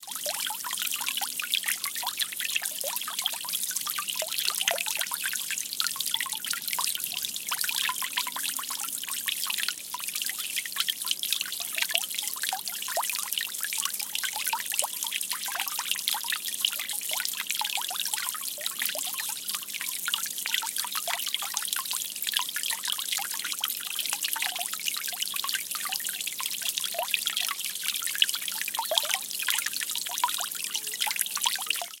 Little Water Fountain
Recorded using a Zoom H4N. A continuous sound of a small water fountain in a pond. A bit drain like in its make up.
continuous, drain, fountain, garden, like, pond, small, water